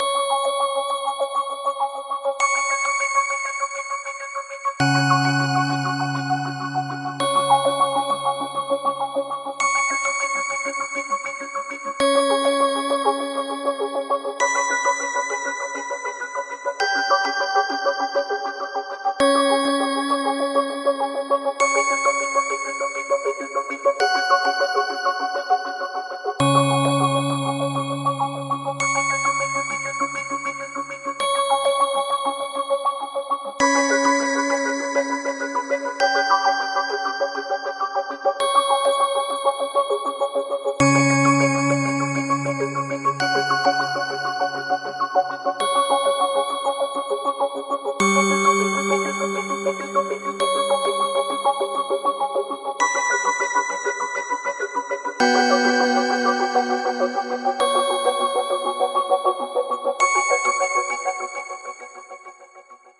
This is a emo cinematic bell sounds made in modern vst in ableton.